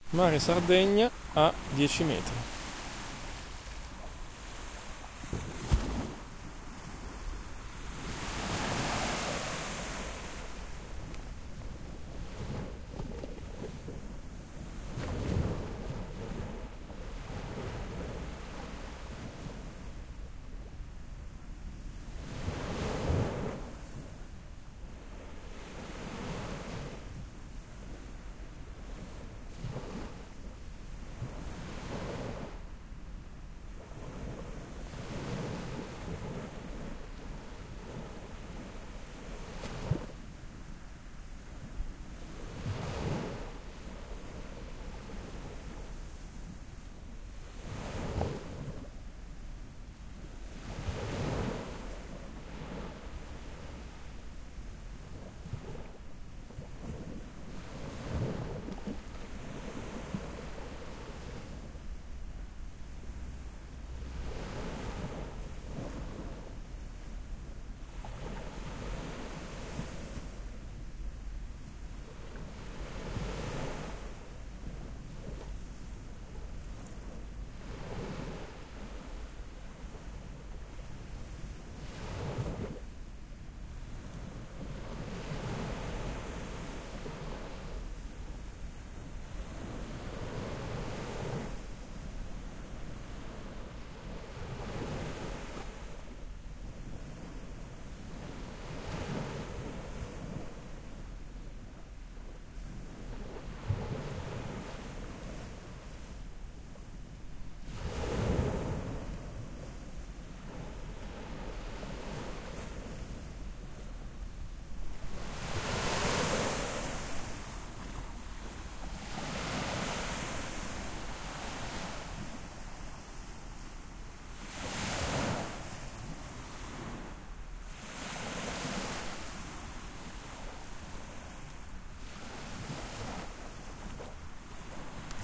mare sardo2
i was close to the sea , a calm sea
water, Sardegna, waves, sea